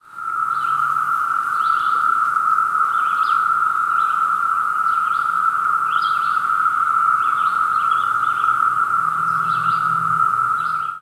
Brood II cicadas near Macon, Powhatan Co, VA
A single cicada makes a repeated raspy ratcheting noise. Tens of thousands of cicadas produce an otherworldly chorus that doesn't resemble the harsh noise of an individual insect at all. The phrase "alien spaceship" comes up when trying to find words for the eerie singing note.
Recorded with a Zoom H4n placed on roadside grass about 1 mile from Macon, near Powhatan Courthouse, Virginia (I think the geotag is at the exact position but am not absolutely sure). EQ and hiss reduction applied in Adobe Audition.
[Note: No individual cicadas can be heard on this recording, just an amplitude-modulated 1.3 kHz drone made by uncountable insects over many acres, a few early-afternoon birds, and near the end a slight bass rumble from an approaching pickup truck. The location was near Brood II's somewhat patchy/irregular eastern boundary; a few miles' drive in any direction, none at all were audible.]